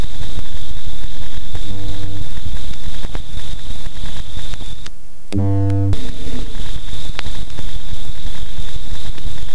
sound-design featuring clicks, hiss, and drones; done with Native Instruments Reaktor and Adobe Audition
2-bar, click, drone, glitch, hiss, loop, sound-design